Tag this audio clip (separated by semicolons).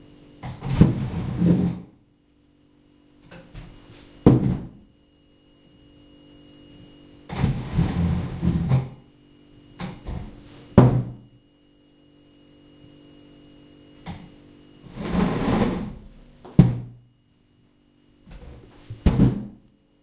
Drag; Pull